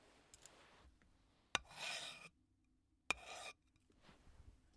Scraping Wood v3
Just someone scraping wood
scrape, scraped, scraping, pull, wood, brush, pulling, grind, grinding, brushing